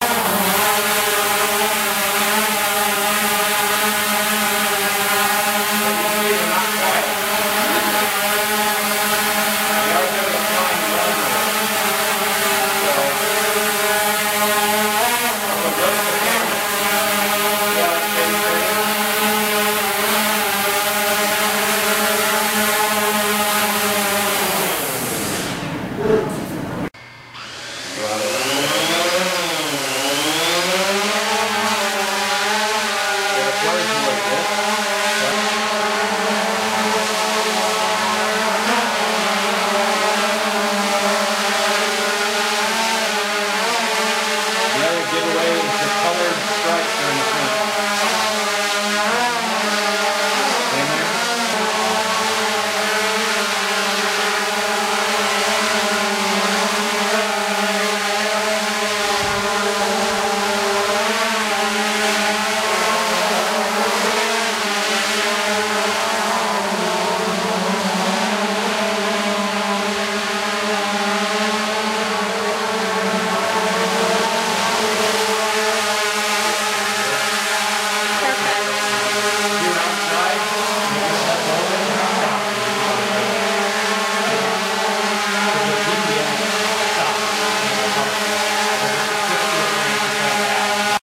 Drone aircraft CoJMC Drone Lab
This is the sound of a drone aircraft being operated in the Drone Lab at the College of Journalism and Mass Communications at the University of Nebraska-Lincoln.
drone, drone-aircraft, drones, flight, propellers